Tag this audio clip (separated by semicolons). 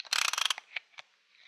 one-shot; metalwork; nut; 80bpm; 2beat; ratchet; tools; bolt; stahlwille